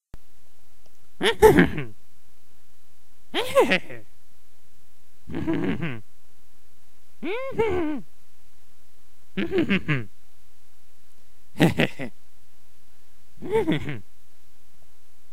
Seven short evil chuckles. Raw recording with a little DC bias.
evil, fairy, gnome, tale